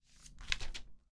1 Pasar Pagina
book, move, page, paper